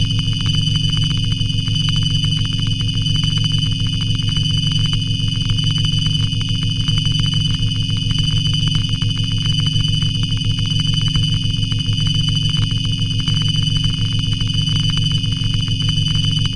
clicky texture simulating some weird machines running in background
ambience, ambient, atmosphere, backgroung, drone, electro, experiment, film, fx, glitch, illbient, industrial, noise, pad, sci-fi, score, soundeffect, soundscape, soundtrack, strange, suspence, texture, weird